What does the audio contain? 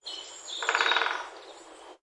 Woodpecker, Distant, B
Audio of a distant woodpecker in a Surrey forest. Some partial editing in the RX editor to clean the ambience. The recorder was approximately 20 meters from the woodpecker.
An example of how you might credit is by putting this in the description/credits:
The sound was recorded using a "Zoom H6 (MS) recorder" on 16th February 2018.
woodpecker, wood, distant, knock, pecker